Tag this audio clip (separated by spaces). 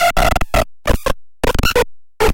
bent electronic musique-incongrue robot glitch circuit-bending